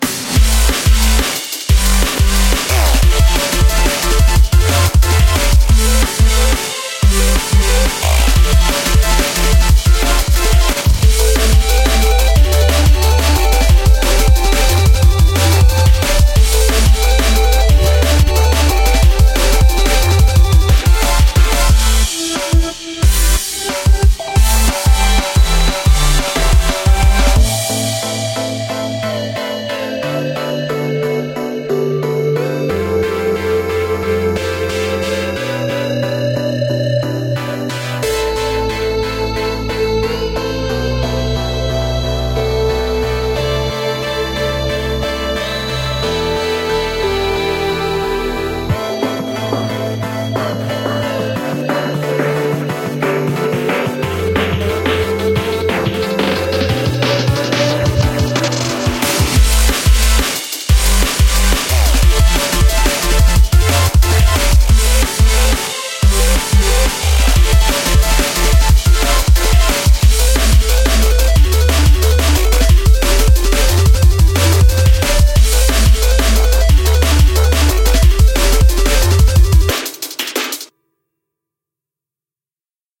Drum and Bass Music
Short drum and bass track
synth, bass, drums, paced, fast, music, reverb, dnb